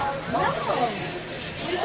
wildwood boardwalk no loophb
Another loop from on the boardwalk in Wildwood, NJ recorded with DS-40 and edited in Wavosaur.